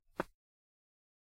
Footstep Rock
This sound is of someone taking a step on a big rock.
Footstep, Generic, Road, Rock